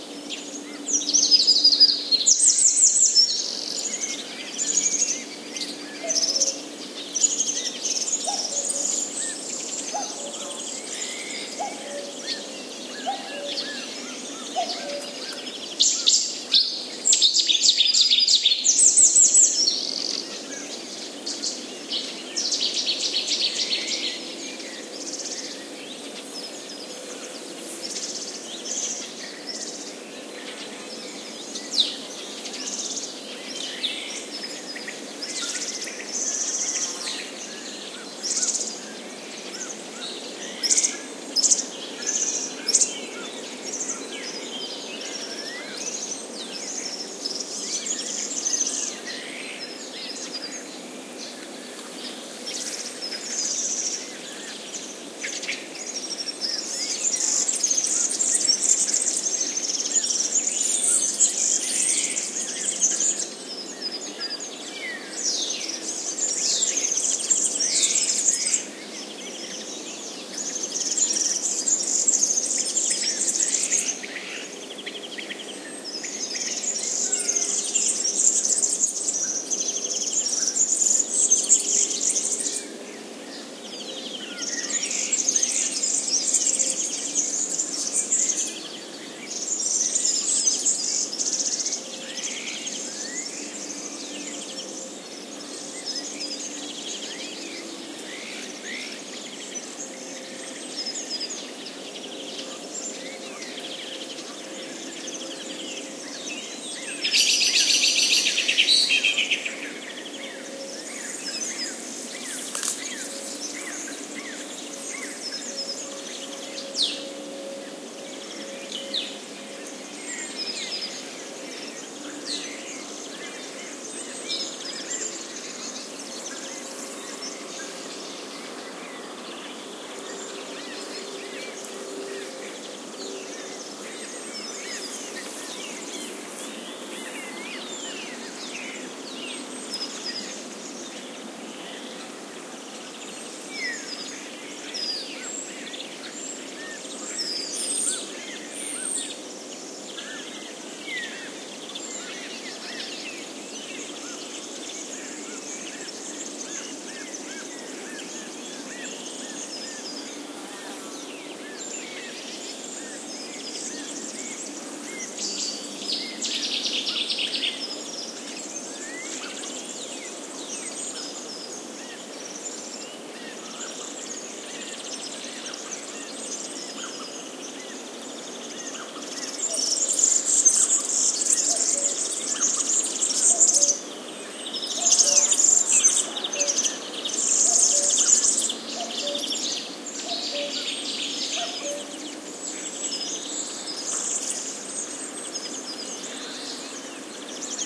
20180322.Clippy.XLR.pair.105
Test recording of forest ambiance with lots of birds singing (Warbler, Serin, Blackbird, Cuckoo, Azure-winged Magpie, among others). Clippy XLR EM172 Microphone Matched Stereo Pair (FEL Communications) into Sound Devices Mixpre-3. Recorded near Hinojos (Huelva Province, S Spain). Noise traffic at 4 km is perceptible
birds, field-recording, forest, gear, nature, south-spain, spring